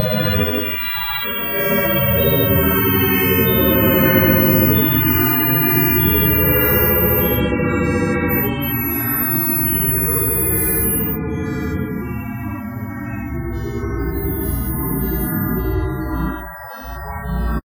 science fiction noise